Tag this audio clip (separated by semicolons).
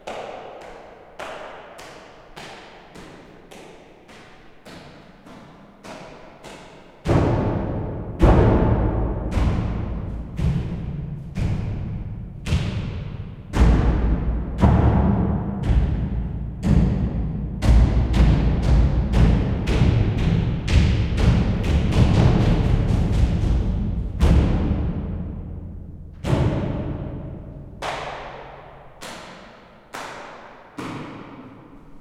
castle; chamber; footsteps; majestic; medieval; reverb